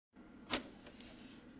Dvířka otevírání
Opening doors from PC cage